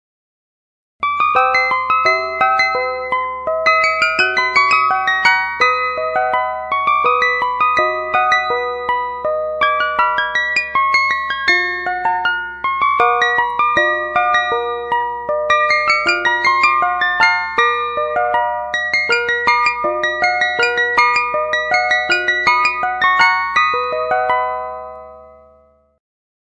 The Entertainer Clockwork Chime Version
This is the old style clockwork chime version of The Entertainer. I love the Digital ll version, but this version is more classic sounding. This one also has the odd key changes at the end. Hope you enjoy.
Chime, Song, The-Entertainer